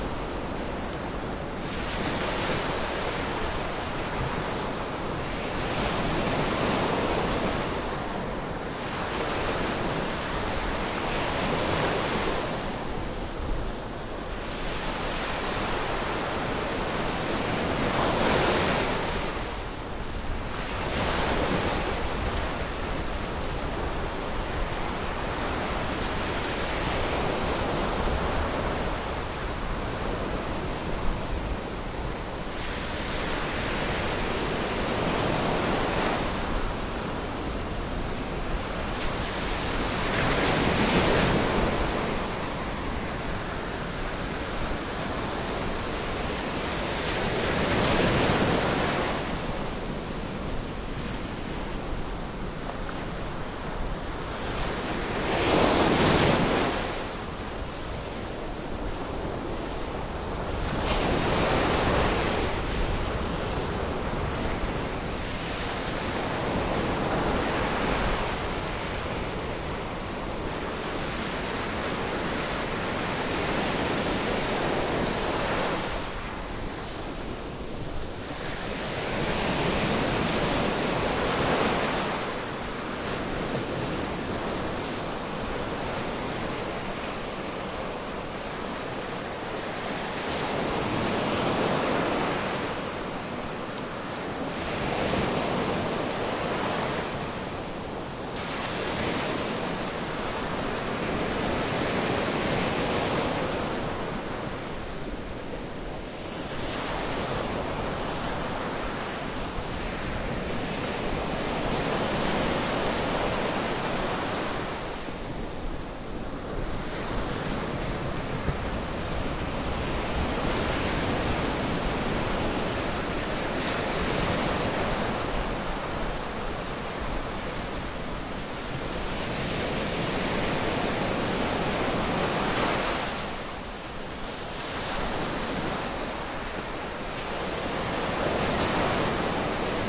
Beach Soundwalk Recording at Platja Trabucador Xelin l'Ametlla de Mar, August 2019. Using a Zoom H-1 Recorder.